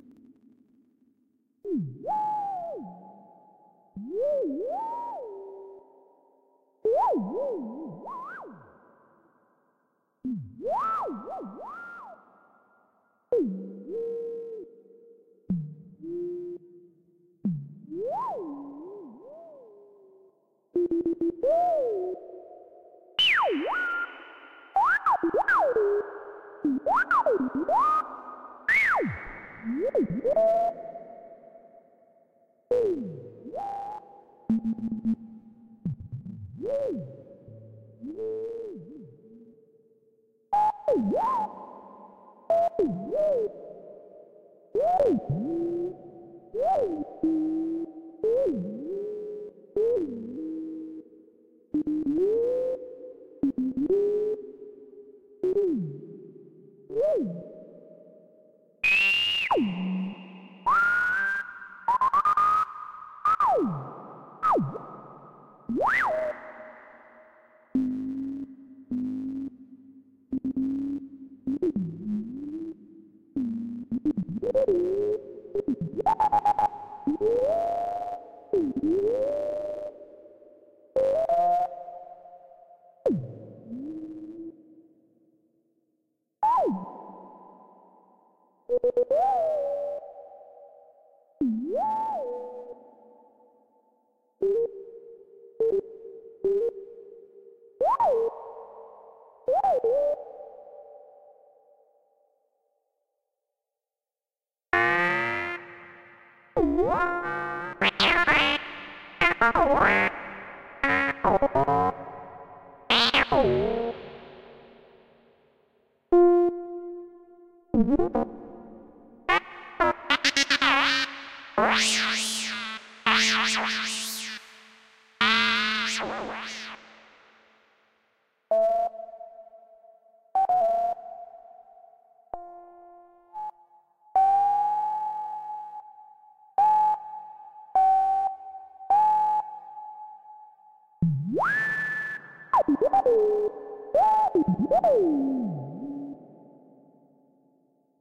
robots have feelings
some small voice ideas for a robotic character.